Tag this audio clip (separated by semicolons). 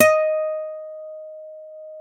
acoustic; guitar; nylon-guitar; single-notes